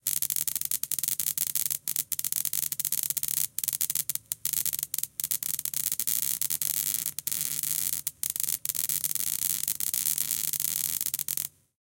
Electric Sparks
Sparks generated from a 35,000 volt DC Universal power supply
Equipment:
DPA 4060 stereo pair
Schoeps miniCMIT, Schoeps CMC 5U with MK8 capsule
Sound Devices MixPre-6
cracking, cracks, electric, electrical, electricity, glitches, glitching, heat, high, hot, ion, iron, power, sizzle, sizzling, spark, sparkling, sparks, supply, voltage